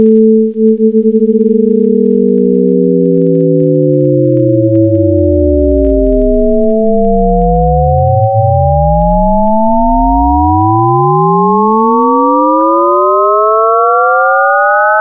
(Sin[440*Pi*t] + Sin[880*Pi*t + 0.1])*Cos[10*t^3] for t=0 to 15
formula, maths